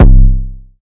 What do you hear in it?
JH 808 1 C (0.5 sec)
Do you want more samples?
This track was created using Fl Studio 20
KEY: C
BPM: 120 (duration 0.5 seconds)